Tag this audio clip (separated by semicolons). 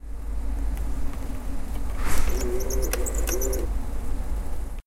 campus-upf; card; get-card; printer; printer-machine; UPF-CS14